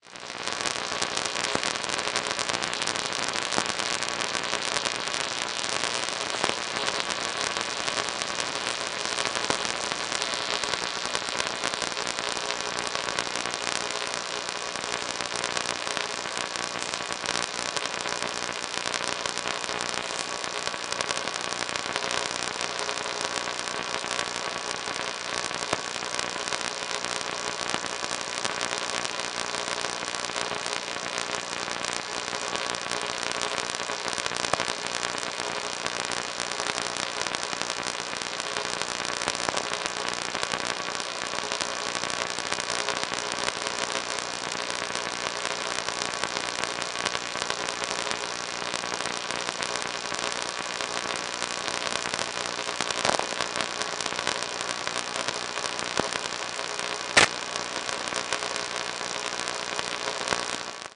inspire 21Xan2008-07:00:01
offers a public continuous source of audible signal in VLF band direct of our ionosphere.
In this pack I have extracted a selection of fragments of a minute of duration recorded at 7:01 AM (Local Time) every day during approximately a month.
If it interests to you listen more of this material you can connect here to stream:
shortwave, radio, vlf, static, noise, electronic